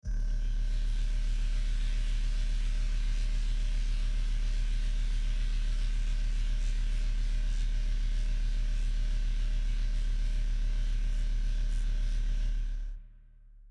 sci-fi drone